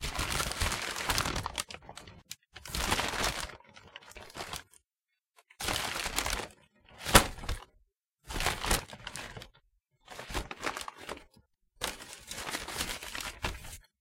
Grabbing and releasing a large sack of potatoes, with a paper rustle sound.
fold, potatoes, rustle, sack